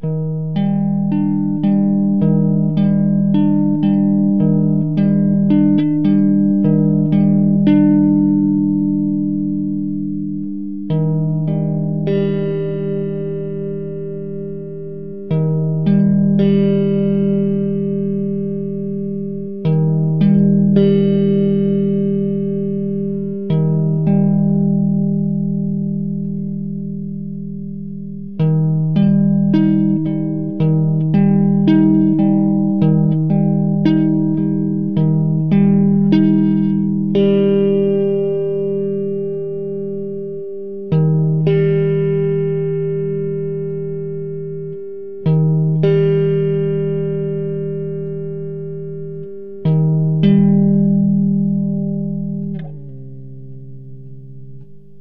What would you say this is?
Guitar Amateuristic Moody
The only recording ever made of me playing the electric guitar. I'm a drummer ;).
amateur, amateuristic, ambient, atmosphere, atmospheric, dark, echo, electric-guitar, guitar, melancholic, melancholy, mood, moody, music, piece, playing, recording, reverb